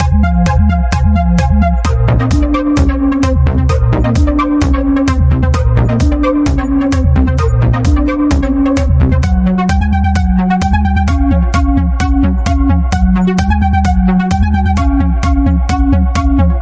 Situation Beat
texture, nexus, music, beat, common, situation, trance